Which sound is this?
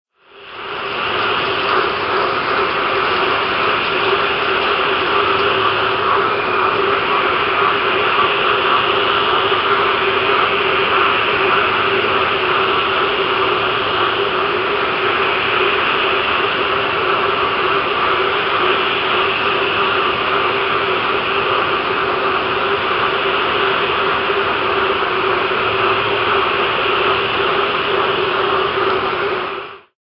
Frog Chorus
Thousands of Frogs singing in anticipation of rain.
Australian-Frogs, Queensland, Frogs